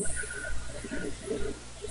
262827 beachman maybe-thats-it Cleaned
paranormal, anomaly